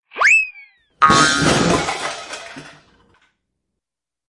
caida/fall/tumble/mess
Rejunte de varios sonidos de cosas cayendo en desorden, precedidos de un desliz cómico de cartoon.
animado, tropiezo, funny, tumble, caen, tumble-out, caida, cartoon, cae, golpe, trip-over, disaster, fall, objetos